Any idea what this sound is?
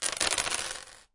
Several small dice released onto a hard surface. The sound has been gated lightly for noise reduction.